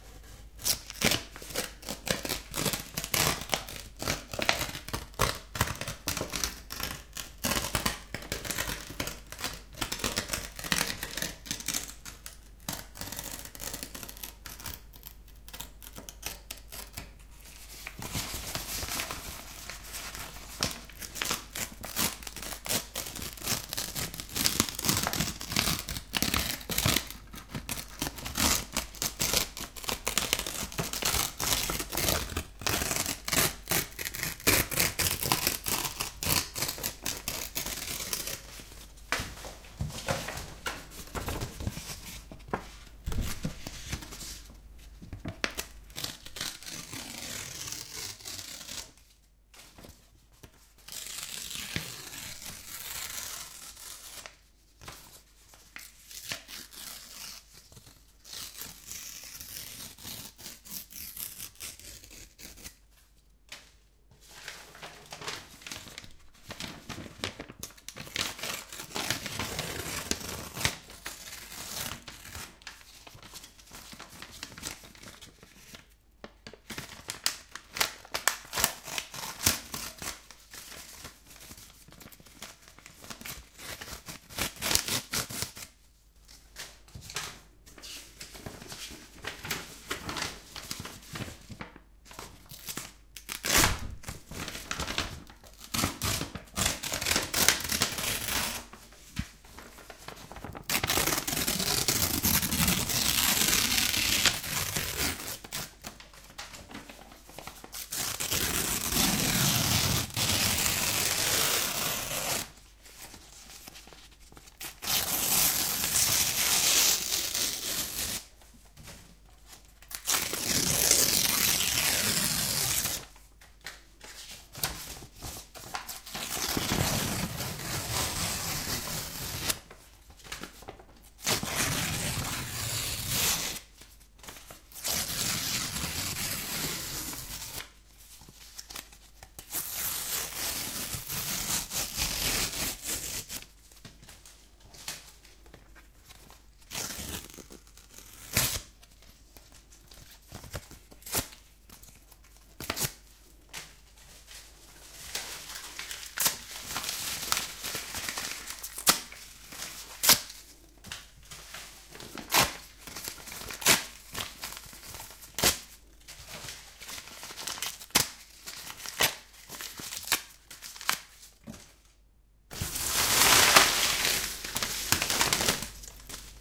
Ripping Paper
Playing around with paper
Recorded with Zoom H2. Edited with Audacity.
rip, ripping, cut, paper, object, cutting, rustle